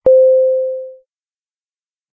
a positive tone
chime, correct, positive-tone